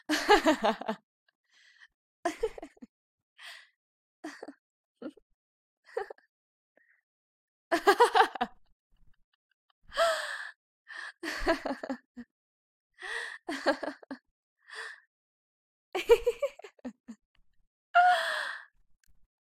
Woman's harmonics - laughs
Woman laughing variations on length, prettiness, hard and soft.
laugh, funny, labs, jolly, girl, sound, joy, women, giggle, voice, sounds, OWI, laughter, harmonics, laughing, harmonic, humor, humour, female, happy, happiness, woman